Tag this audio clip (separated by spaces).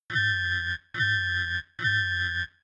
Alert Emergency System Warning